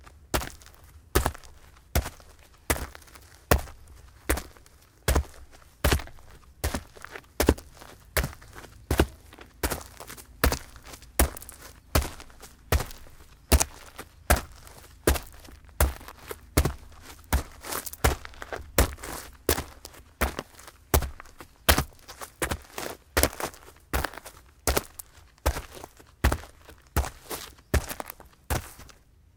footsteps boots heavy gravel ext
boots, ext, footsteps, gravel, heavy